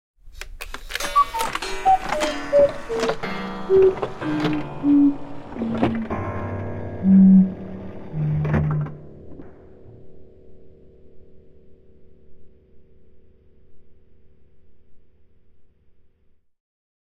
Cuckoo Clock, Breaking Down, A
Using one of my cuckoo clock recordings, I played it multiple times, each at a slower speed than the last, giving the impression of it breaking.
An example of how you might credit is by putting this in the description/credits:
The sound was recorded using a "H1 Zoom recorder" on 5th December 2017, also with Kontakt and Cubase.
clockwork, mechanical, clock, down, breaking, machine, tick, cuckoo, break